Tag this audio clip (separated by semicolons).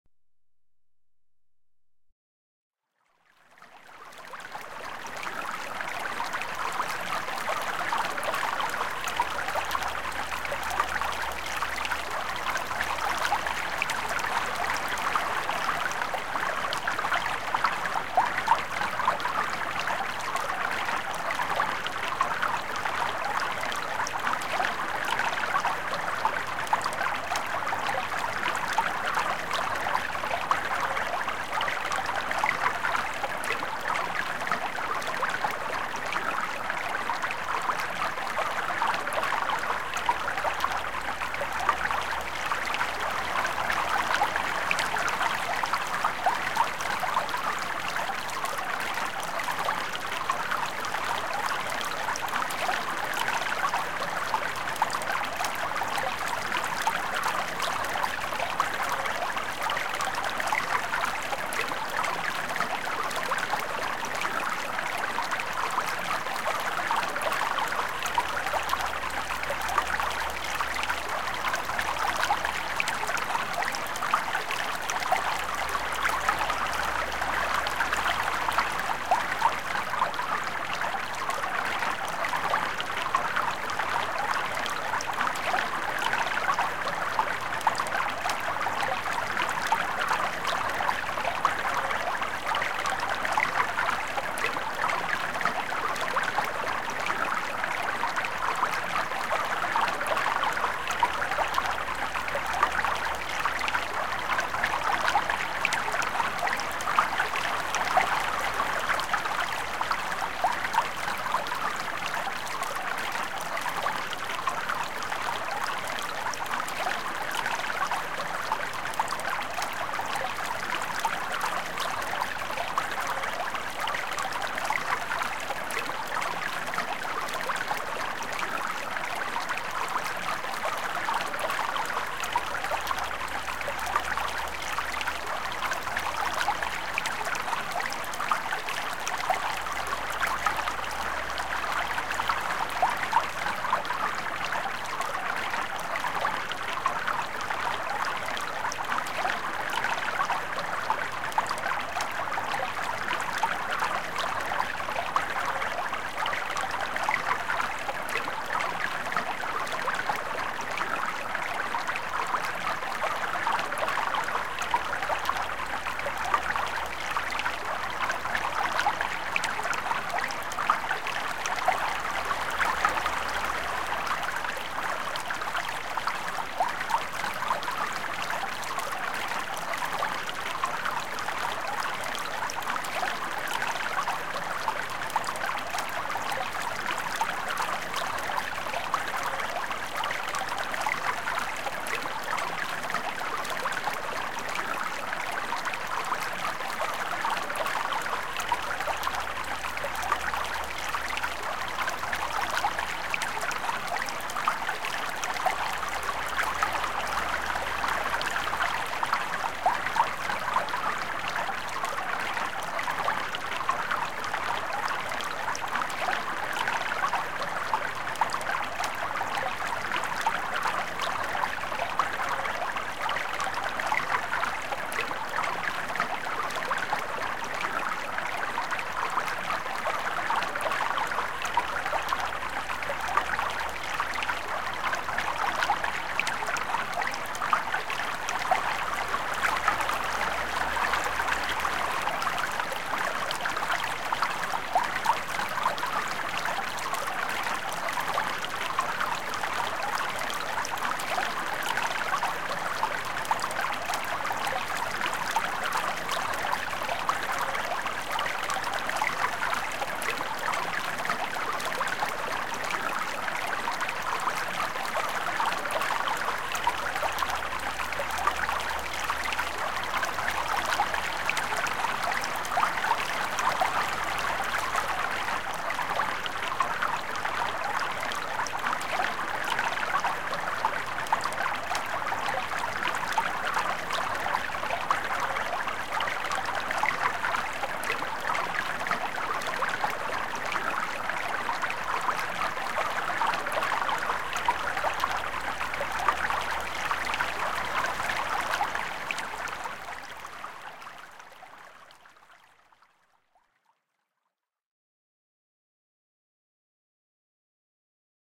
water; Current; brook; Rivers